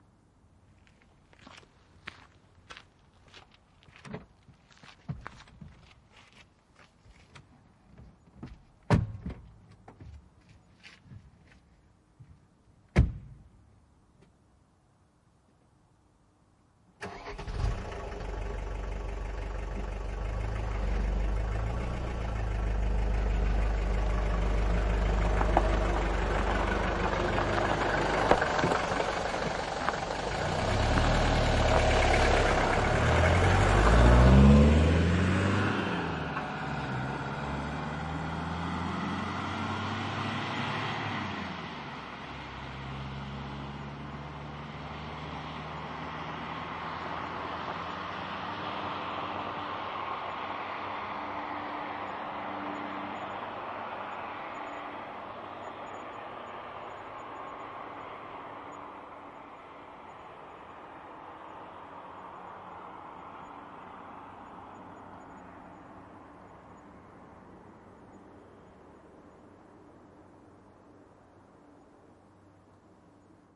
Mercedes Benz 200 D. Passenger and drives goes into the taxi, car leaves // Mercedes Benz 200 D matkustaja ja kuljettaja menevät taksiin, auto lähtee.
Mercedes Benz 200 D, mersu. Kuljettaja avaa takaoven matkustajalle, joka menee sisälle autoon, auton ovi. Kuljettaja sisälle, ovi, lähtö, auto etääntyy asfalttitiellä.
Paikka/Place: Suomi / Finland / Vihti
Aika/Date: 1985
car, diesel, finnish-broadcasting-company, leaves, Mecedes-Benz, taksi, taxi